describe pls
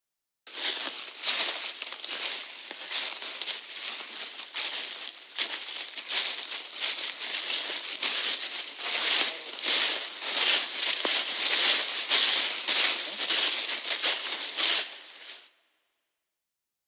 walking-on-leaves, crunchy, day-time
Was walking through a sea of leaves during the Fall at Sugarloaf Mtn., decided to record some of it to keep! Recorded with an iPhone 6.